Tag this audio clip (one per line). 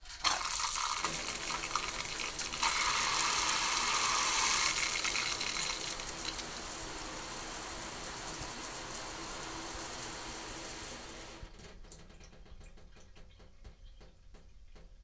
bathroom
bathtub
shower
water